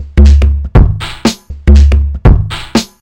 This is one of my first drum loops. Made by cutting and pasting samples in MS sound recorder. I turned out pretty good, though.